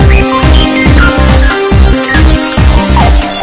Track Made For A Game With Same Name Asteroids #1
Techno, Asteroids